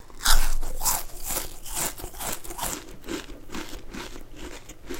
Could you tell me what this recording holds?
Crunchy cookie eating
Eating a crunchy cookie. Last seconds also sound like footsteps on a sand like surface.